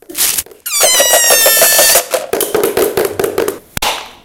Soundscape LBFR Estella et bahar
Soundscape, Labinquenais, France